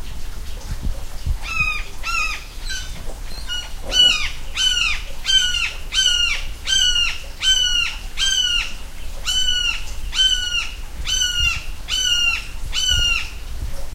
lost maples baby eagle
bird, birds, crying, eagle, field-recording, forest, hiking, hill-country, nature, outdoors, squawking, texas, wildlife